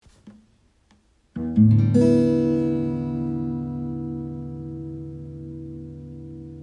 Strumming Guitar
scrumming a guitar no chords
strum, guitar